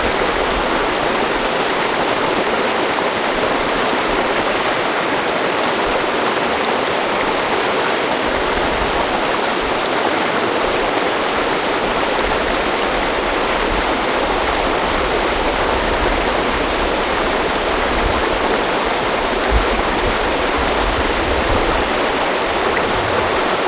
greece naxos water 1
Water in the remaining of the roman aqueduct. Loud.
naxos, aqueduct, water, roman, greece, loud, melanes, fast